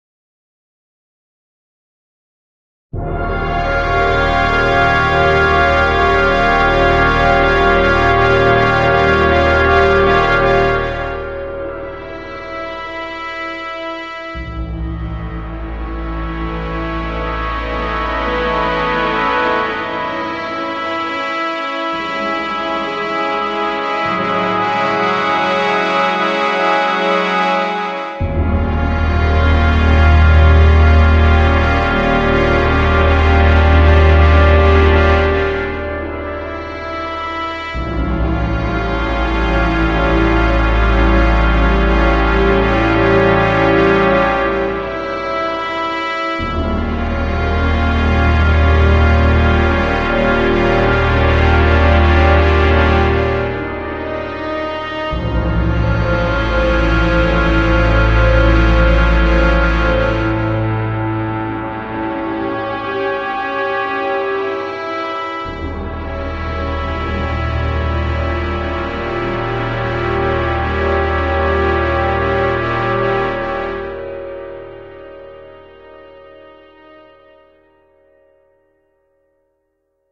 Eflat Minor Synth Pad
This is a chord progression from Rachmaninoff's Elegie in Eflat Minor played on Music Maker's virtual Cinematic Synth. Starts with a bang and swells. Attention-getting, has the sound of brass.
attention-getting, booming, brass, cinematic, classical, deep, dramatic, dynamic, e-flat-minor, epic, intro, opening, orchestral, pathos, Rachmaninoff, sample, slow, soundtrack, Synth, trailer, unusual